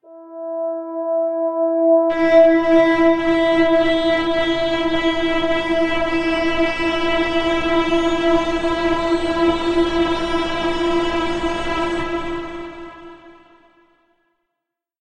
THE REAL VIRUS 07 - GIGANTIC - E5
Big full pad sound. Nice evolution within the sound. All done on my Virus TI. Sequencing done within Cubase 5, audio editing within Wavelab 6.
pad, multisample